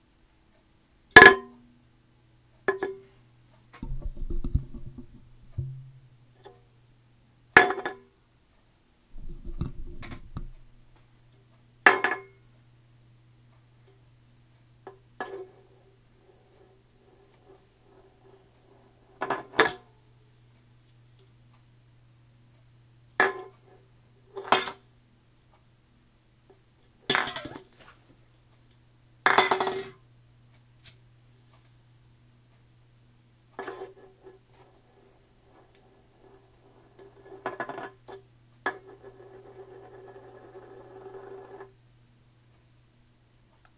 I was testing a mic, I did various things like knocking it over and whatnot.